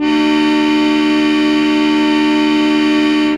An old brown plastic Wind Organ (?)-you plug it in, and a fan blows the reeds-these are samples of the button chords-somewhat concertina like. Recorded quickly with Sure sm81 condenser thru HB tube pre into MOTU/Digi Perf setup. D Major Chord.